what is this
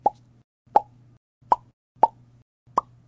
Cartoon popping sound.
cheek-pop, pop